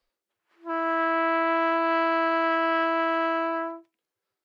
Part of the Good-sounds dataset of monophonic instrumental sounds.
instrument::trumpet
note::E
octave::4
midi note::52
good-sounds-id::2859
E4, good-sounds, multisample, neumann-U87, single-note, trumpet